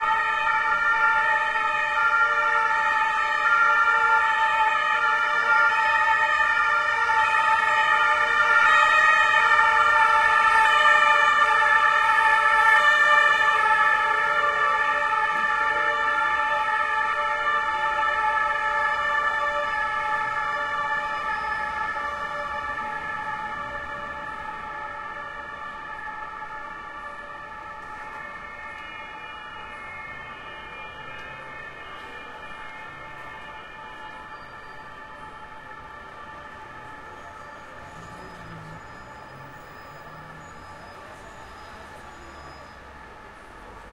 Berlin, Friedrichstraße police car siren XY
police cars with siren passing by at Friedrichstraße, Berlin
recorded with Roland R-26 XY mics